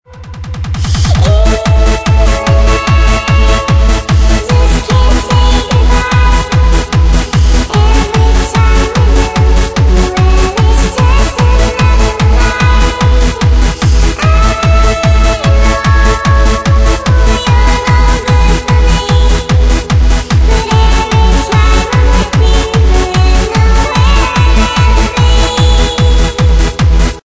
My first attempt to create a complete Track.
148bpm, dance, singing, song, techno, trance, vocal, voice